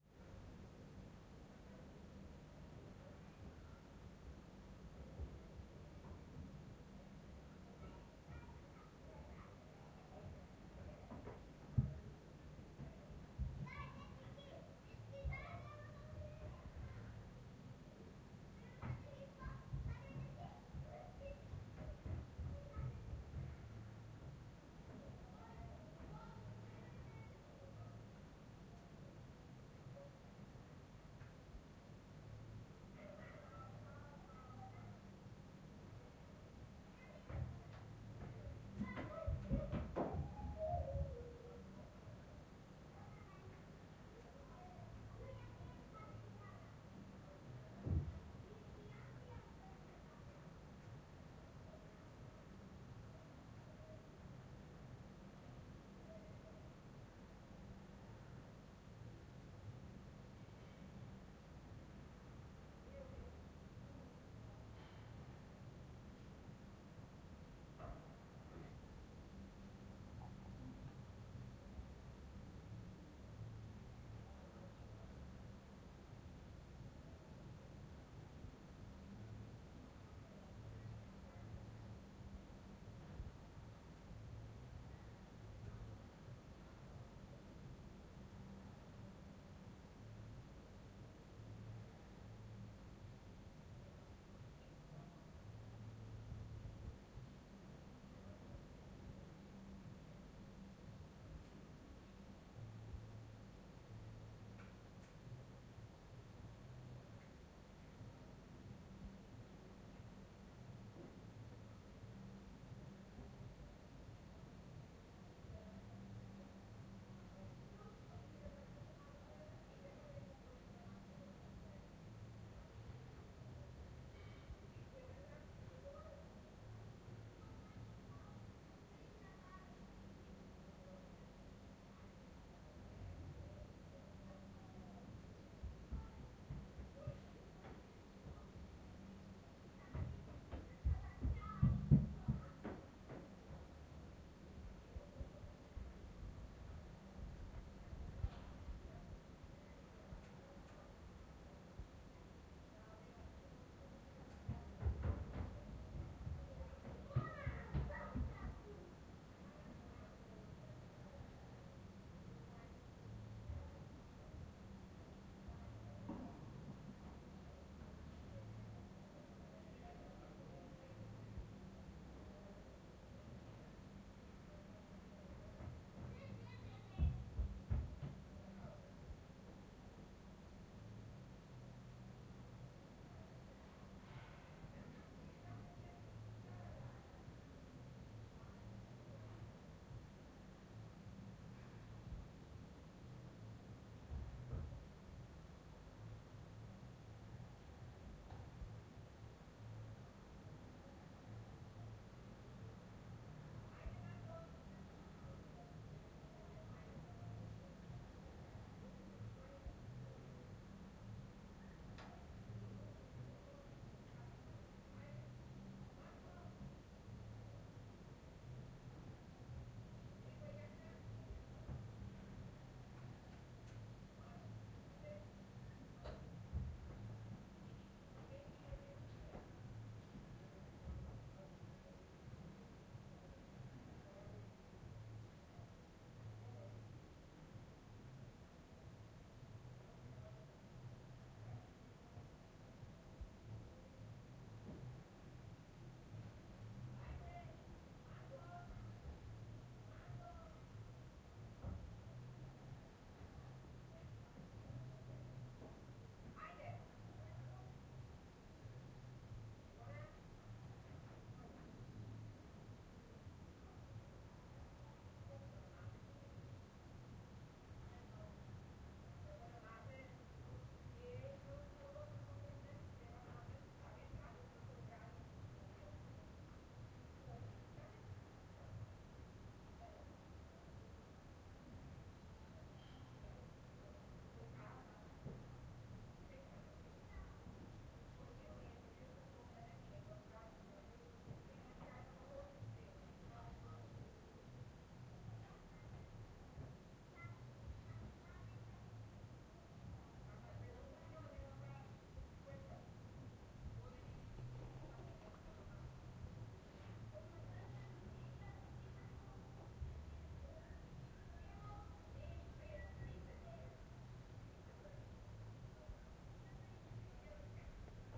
Roomtone apartment, neighbour's children running